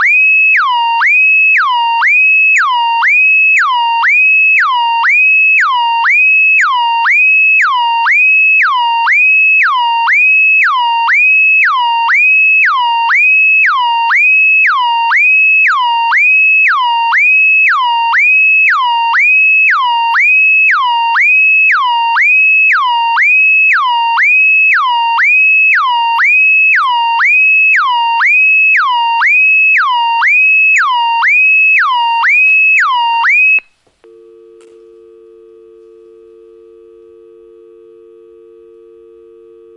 Alarm Off The Hook
A stereo recording of a high pitched alarm type sound on UK landline telephone after the handset has been off the hook for a while. Rode NT 4 > FEL battery pre-amp > Zoom H2 line in.
uk, xy, off-the-hook, alarm, telephone, bt, stereo